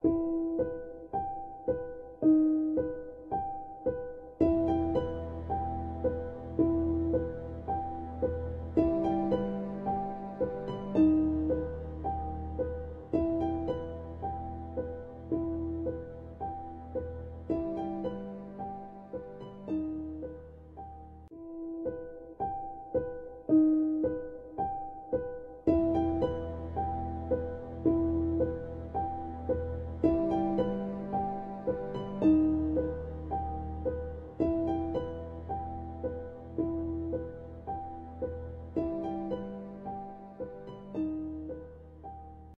this loop is ideal for backgrounds music for video games or horror
loophorror, loops, loop